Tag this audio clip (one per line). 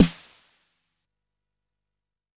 909
drum
jomox
snare
xbase09